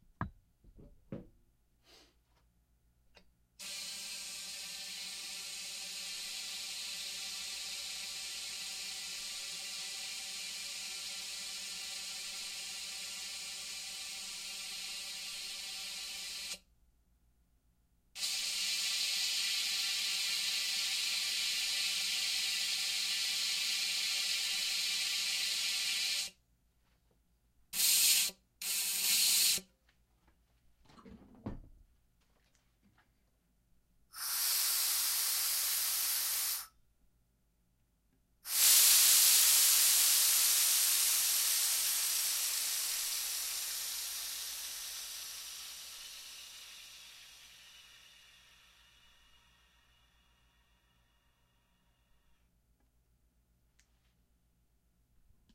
steam pipe 2
needed some steam pipe sounds so I made some up h4n